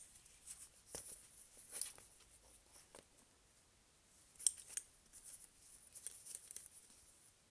this is the sound a belt makes when one puts it on.